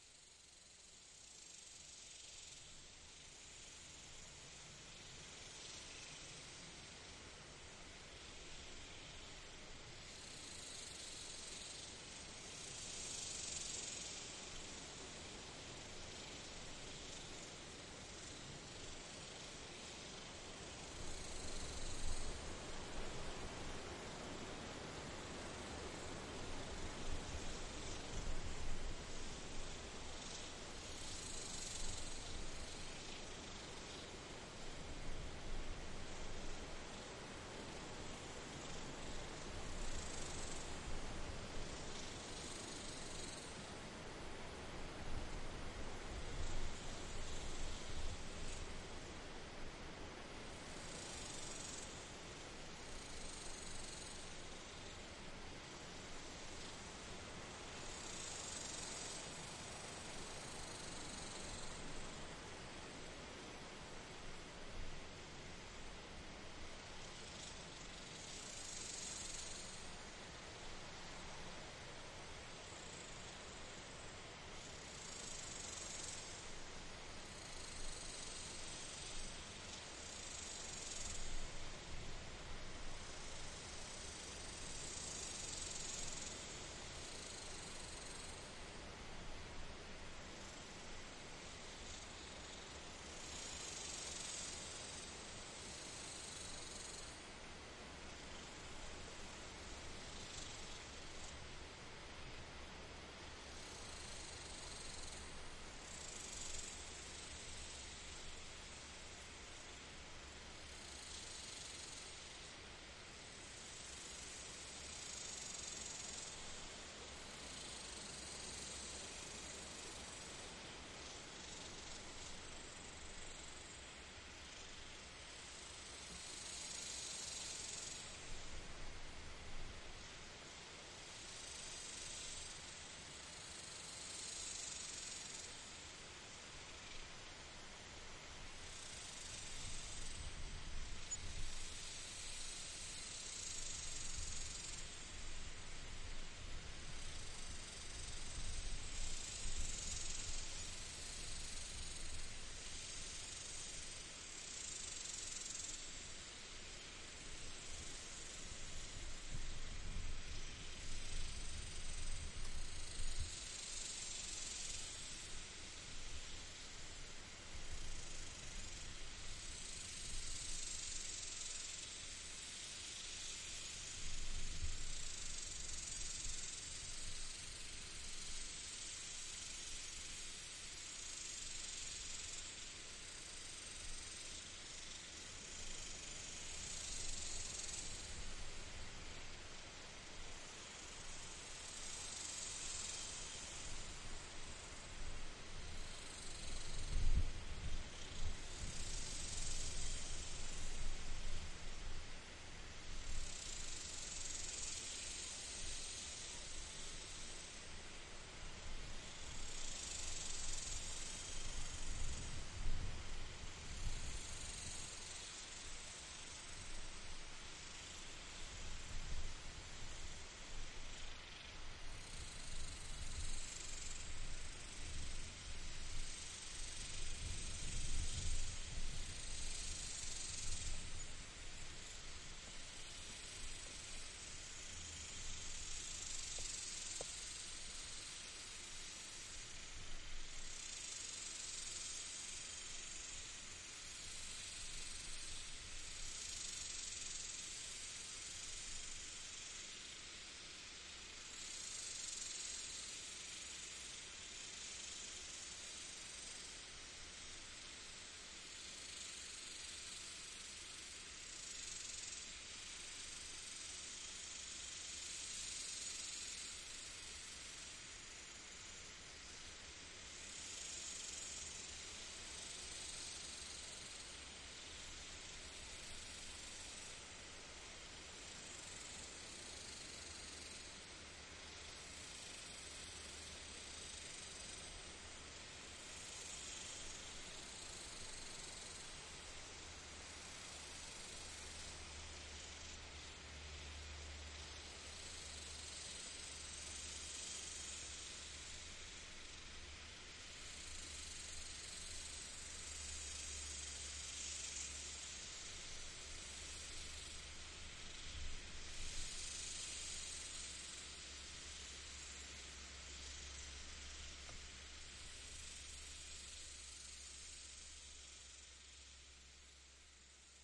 cicadas & wind
country, field, recording